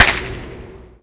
Impulse responses recorded while walking around downtown with a cap gun, a few party poppers, and the DS-40. Most have a clean (raw) version and a noise reduced version. Some have different edit versions. Parking lot in between 2 buildings.